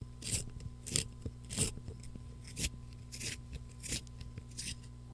E9 pencil-sharpener
the procedure of sharpening a pencil
writing, sharpener, pencil